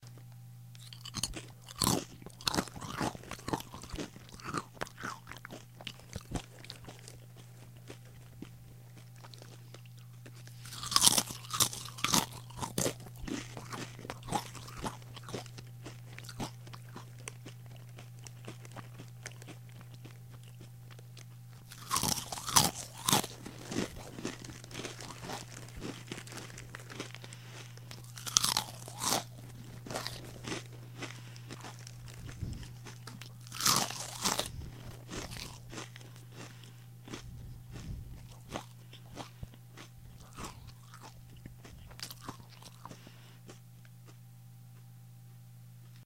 eating chips
The sound of eating crunchy corn chips. Some lip smacking and chewing with mouth open. Cheap microphone hooked up to a DELL and an Audigy sound card